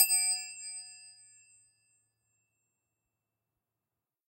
Wrench hit F#3
Recorded with DPA 4021.
A chrome wrench/spanner tuned to a F#3.